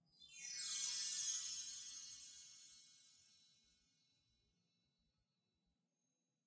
Recorded and edited the chime sound from the soundfont that used to be installed along with SynthFont.